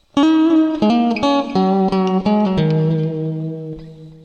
guitar.intro01
a short intro played with Ibanez electric guitar, processed through Korg AX30G multieffect (clean)
electric-guitar, musical-instruments